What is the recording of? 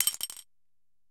marbles - 15cm ceramic bowl - drop into bowl full of ~13mm marbles - 1 ~13mm marble 03
Dropping an approximately 13mm diameter marble into a 15cm diameter bowl full of other ~13mm marbles.
bowl, ceramic, ceramic-bowl, dish, drop, dropped, dropping, glass, glass-marble, impact, marble, marbles